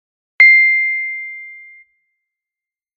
Idea Soudn Effect
Idea, thing, Boh